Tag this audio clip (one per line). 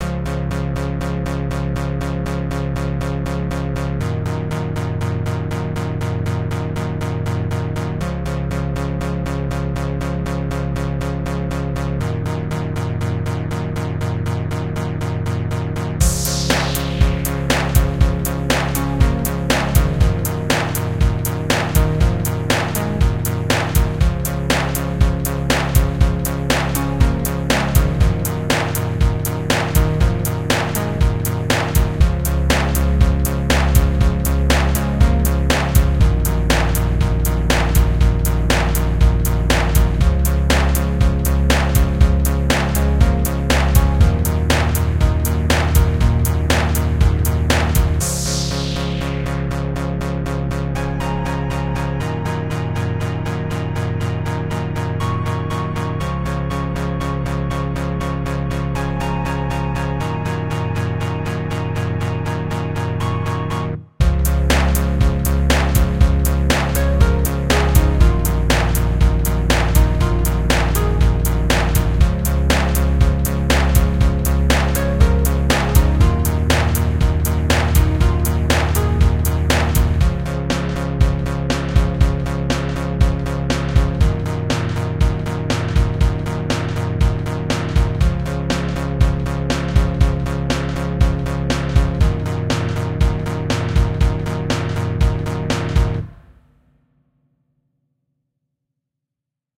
130-bpm
ambient
atmosphere
bird
dance
drum-loop
electro
electronic
groovy
improvised
loop
loopable
music
percussion-loop
pipe
rhythmic
sad
synth
woman